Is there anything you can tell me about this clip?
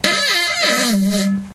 funny assed toilet fart 1
My funniest toilet fart yet!
explosion, weird, noise, poot, flatulence, flatulation, fart, gas